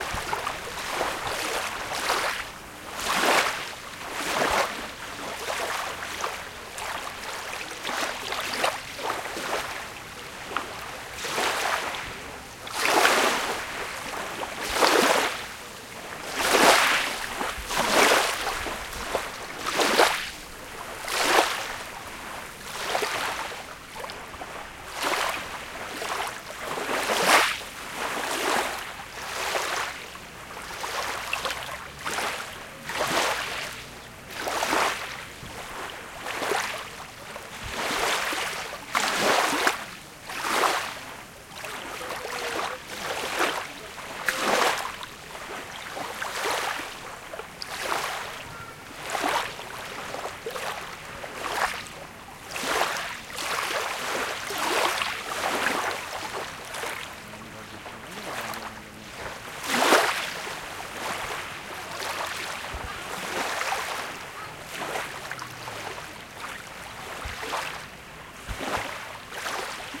Duna River Beach ZOOM0002
beach, field-recording, Humans, Nature, Ocean, Peaceful, Peoples, River, sea, shore, soundscape, Spring, vast, water, waves, Wind